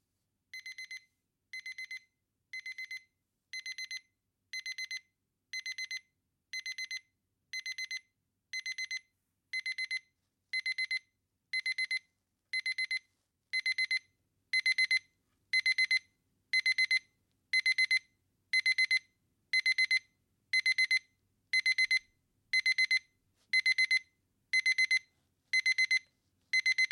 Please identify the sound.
Elec alarm
An electric alarm clock sounds
alarm-clock, beep, clock, Electric, wake, wake-up